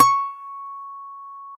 Looped, nylon string guitar note
acoustic, guitar, nylon-guitar, single-notes